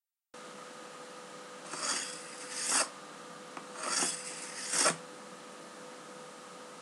sound2-two materials in one
This sound was created by two materials. A cap of jar, which is making circles on a table.
P.S: there is a background behind, it's my laptop. Sorry for that.
cap, creative, noise, sound, table